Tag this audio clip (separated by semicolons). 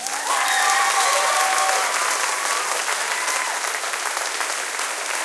applauding; audience; auditorium; cheering; Clapping; People; show; theatre